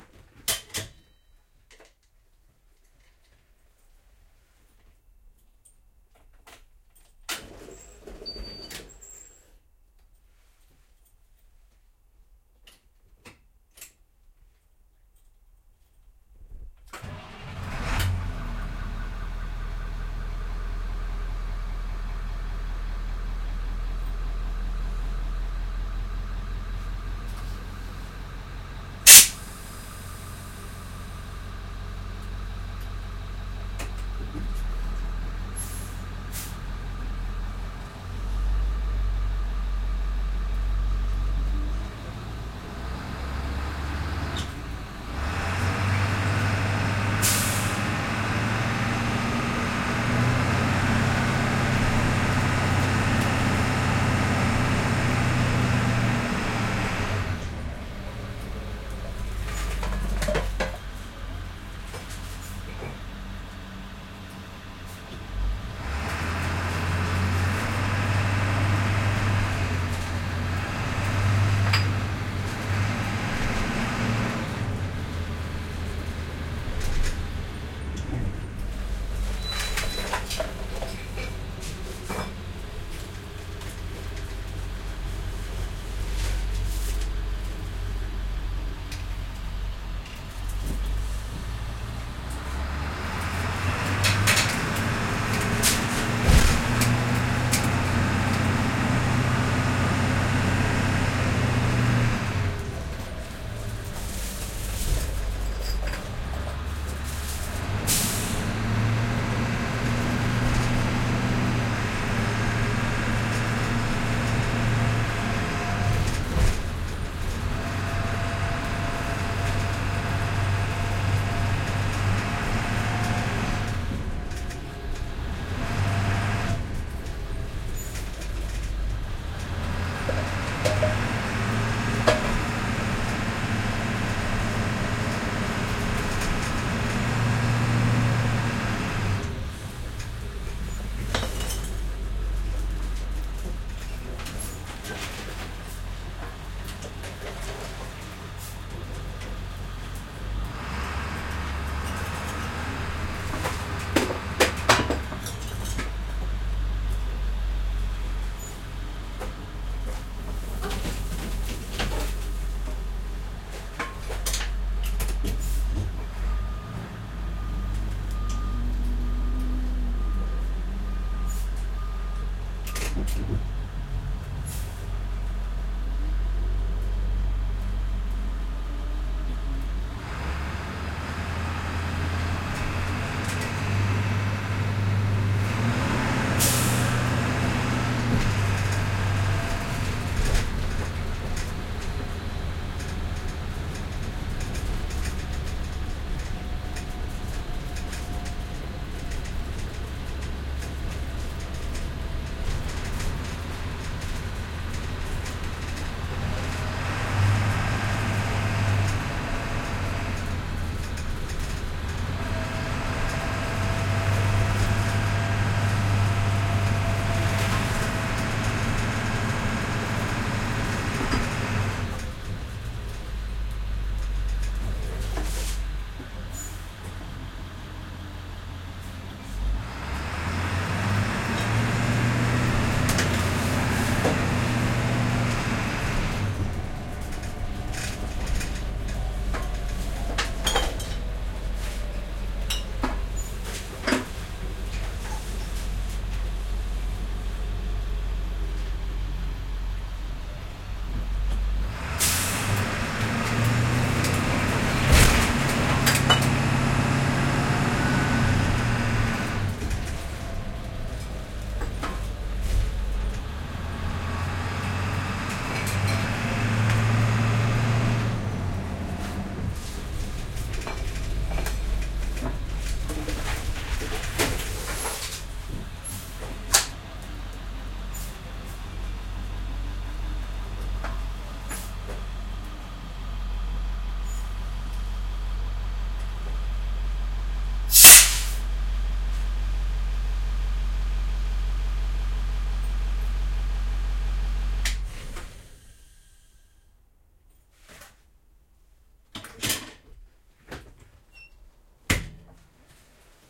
school bus truck int start, drive residential, stop air release +bottle roll and bounce around
bus, school, stop, drive, start, int, release, air, truck